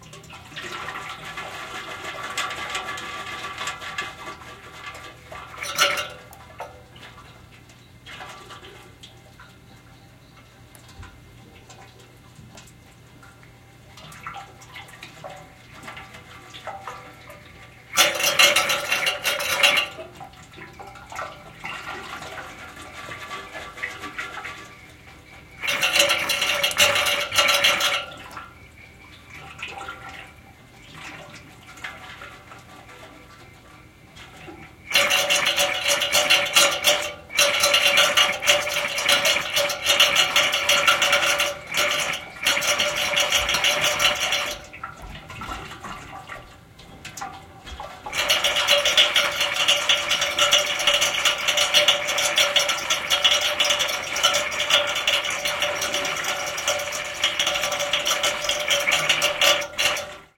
Radiator - Filling up with water, steam noise begin
Radiator filling up with water, hollow metallic liquid sound, radiator beginning to blow steam, clanging noise. Miscellaneous clicks and pops.